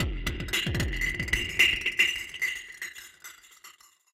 Created with a miniKorg for the Dutch Holly song Outlaw (Makin' the Scene)
phaser, drum-fill